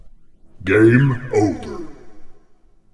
Game Over
One of a set of sounds created for my son's gaming videos. Recorded on a Maono AU-PM430 microphone (see: budget equipment). Edited with Adobe Audition CS6, using reverb and pitch distortion effects. Enjoy!
arcade, game-over, video-game